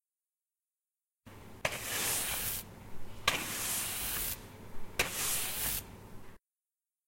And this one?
14-01-sweep-up
Sound of household chores.
chores, CZ, Czech, household, Pansk, Panska